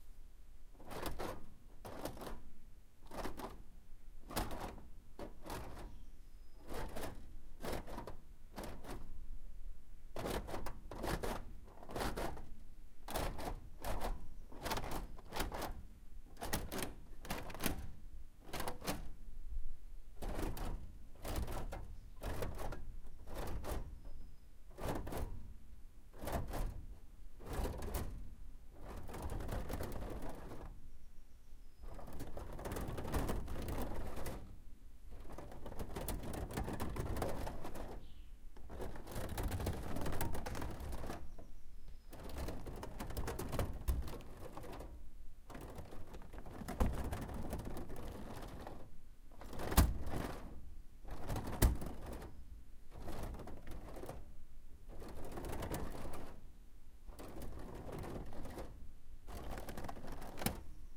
Old car seat creaking
Sounds of creaking springs from an old car seat.
creak, creaking, creaky, metal, old, rusty, seat, springs